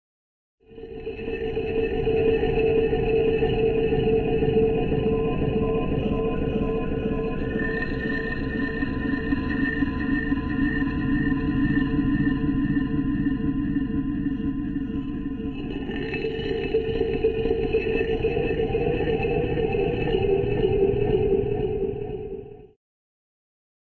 stone-sample (see the stone_on_stone sample pack) played through a FOF-synthesis patch in Max/MSp, using IRCAM vowel-resonator parameters, thus making the stone 'sing'